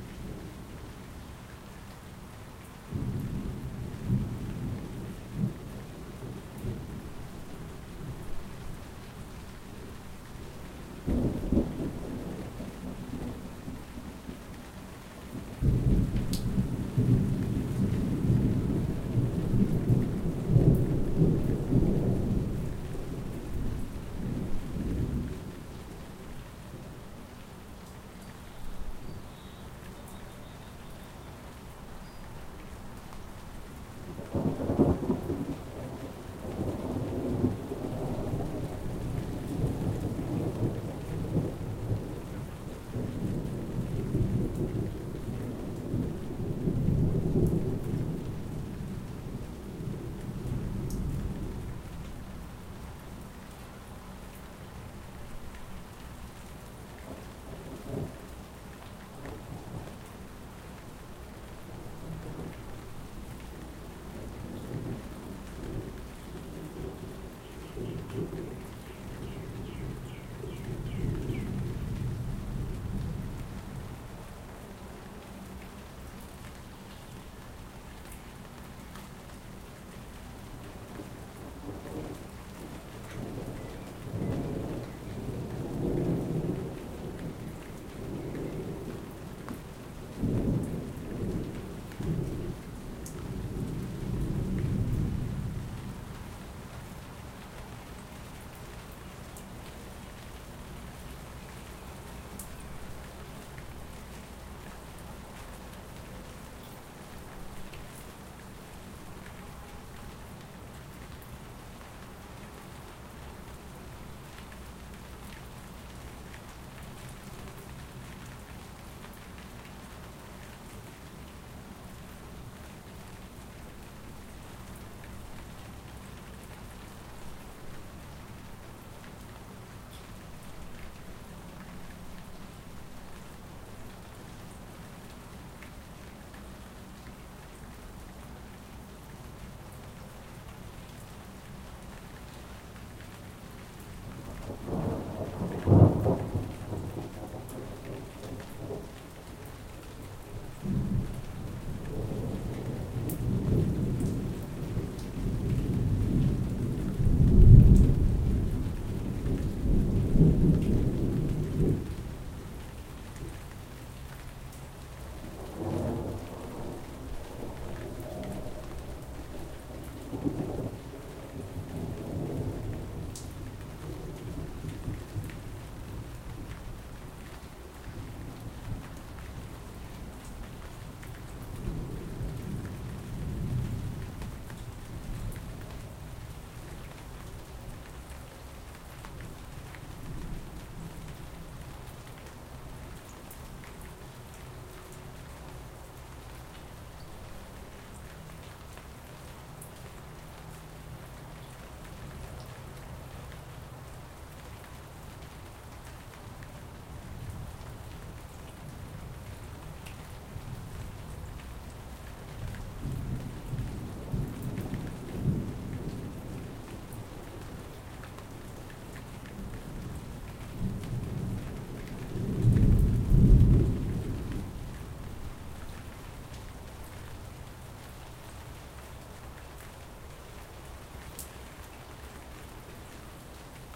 USB mic direct to laptop, some have rain some don't.
field-recording, storm, thunder